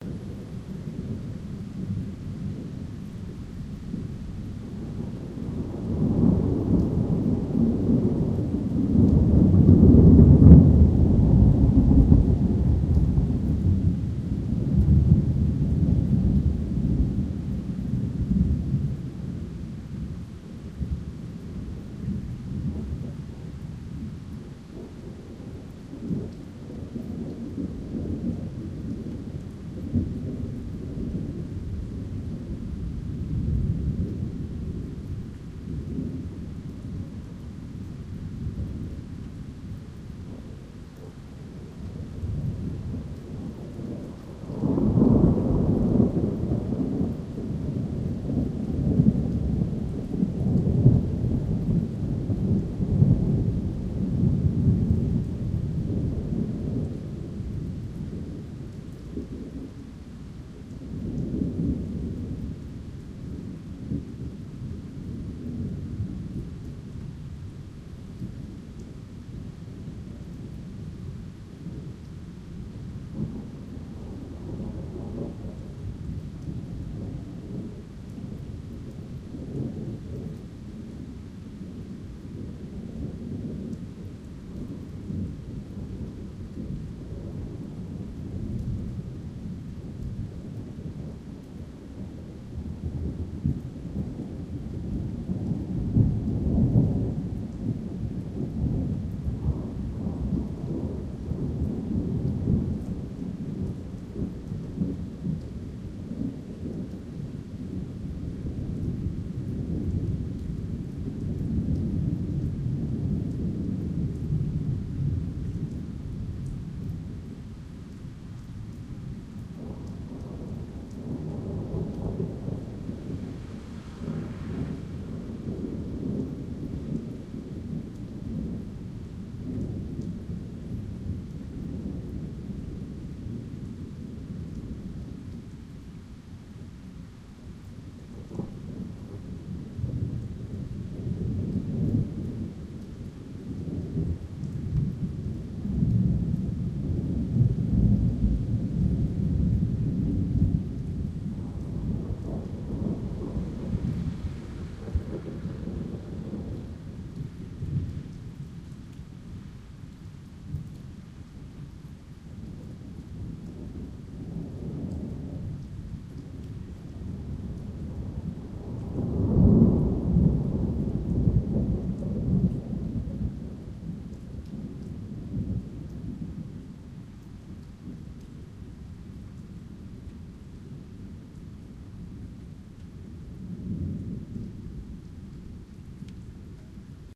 Thunder-H2-without-windstoper
Nagranie wykonane podczas burzy nad Warszawą 19 lipca 2015 roku bez użycia włochacza zasłaniającego mikrofony.
field-recording, nature, storm, weather, wind